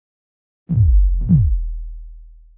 HK rektsaw tripletap B
I made this in max/mxp.
kick-drum, bass, hit, saw, kick, distorted, drum, B, one-shot, multisample, oneshot